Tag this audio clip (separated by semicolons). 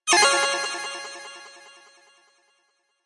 fx; gamesound; pickup; sfx; shoot; sound-design; sounddesign; soundeffect